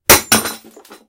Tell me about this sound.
Break of a Small Part of a Lightbulb that Falls on the Ground
wooden, break, light, bulb, fall, glass, falls, shatter, ground, small, crash, smash, part, light-bulb, wood